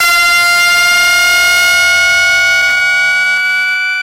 guitar air raid fx
A Casio CZ-101, abused to produce interesting sounding sounds and noises